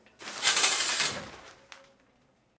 opening shower curtain